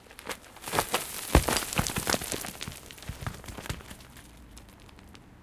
Pushing some gravel off a small hill
Pushing some gravel from the top of a small dirtpile.
Nice particles and distinctive stones rolling.
avalanche, dirt, dust, falling, gravel, hill, particles, stones